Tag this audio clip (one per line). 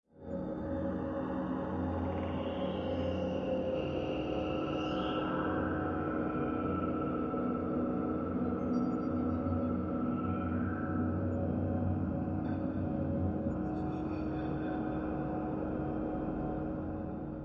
ambient
atmospheres
drone
evolving
experimental
freaky
horror
pad
sound
soundscape